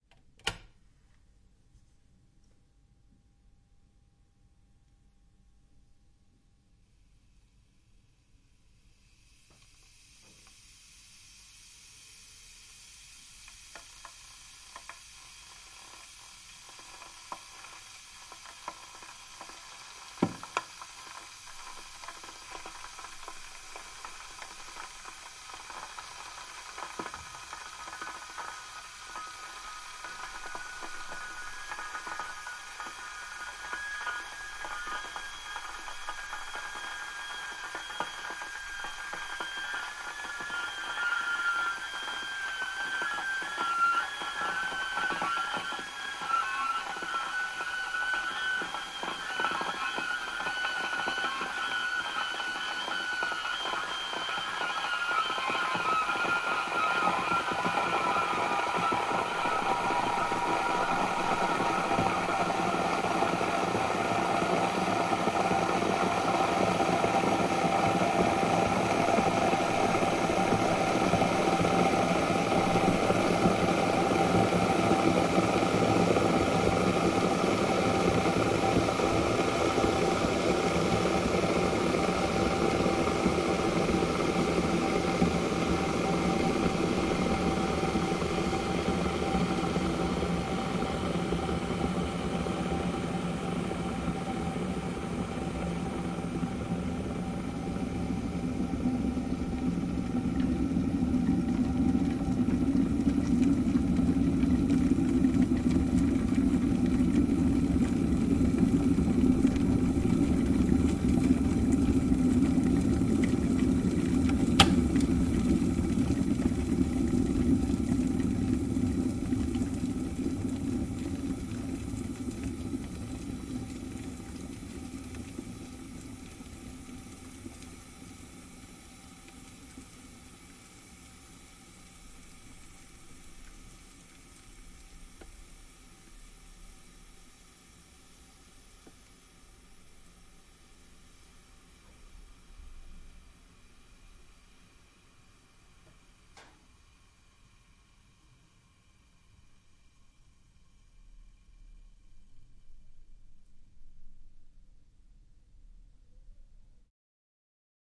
Electric kettle
The whole precedure: Switch-on-klick. Heats up. Hisses with a few nice whistling melodies (from 00:27 on). Boils. Automatic switch off.
Close stereo recording (10cm) with Sony PCM-10. Pretty low background noise (kitchen ambience).
hissing, boiling, whistle, che, boiling-water, hiss, kettle, water, Kitchen, whistling, Wasserkocher, Pfeifen, K